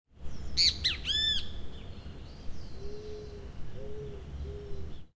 Birds sound. Recorded at home backyard. Cordoba, Argentina
ZoomH4, midgain